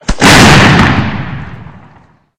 This is a matchlock musket from 1710. Created this for a production of Treasure Island. Muskets like this are crazy dangerous since the operator would load the gunpowder just inches away from a burning ember, or match, which would them be touched to the rifle to make it fire. Has more of a ballistic sound to it when compared to a flintlock musket.